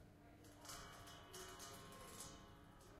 Strumming a pen off a radiator
pen, pencil, radiator, strum